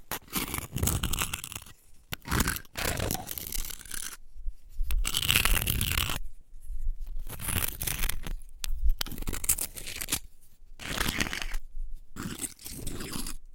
I recorded myself scratching a rock with an oyster shell. this sound was recorded off Ten Mile Creek in Hume, CA using a hand held digital recorder and has only been trimmed using audacity.on September 4th 2014
scratches, rock, scratching, scratch